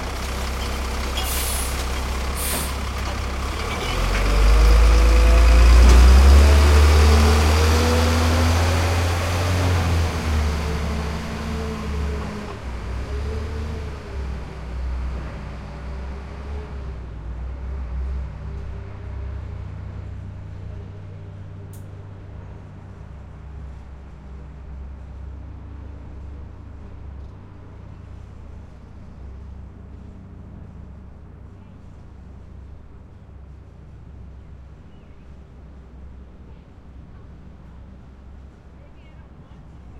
garbage truck exit
A garbage truck idling, then driving away down my street. Recorded with an AT4021 stereo pair into an Apogee Duet and edited with Record.
cityscape, engine, traffic, garbage, city, truck